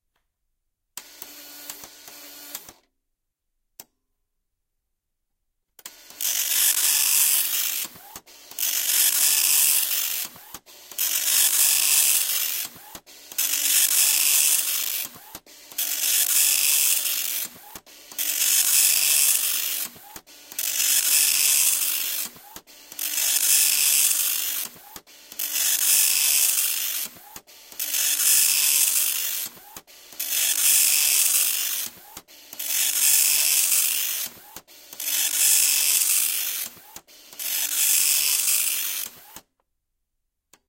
atari printer
very loud atari matrix printer